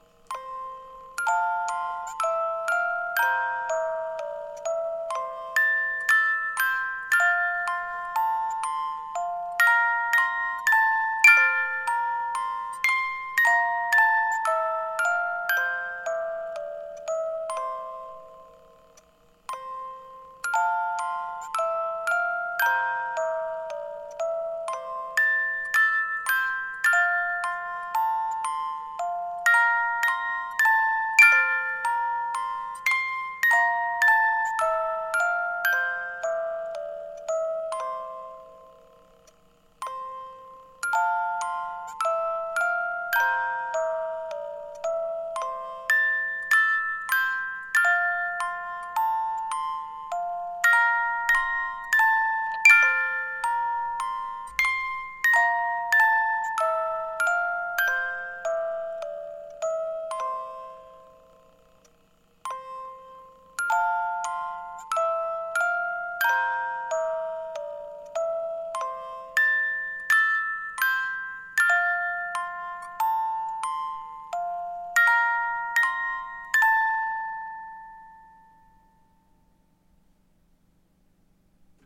Pozytywka F.Chopin nokturn recorded by mxl 440
Fryderyk Chopin Tristesse piano jewellery box recorded by MXL 440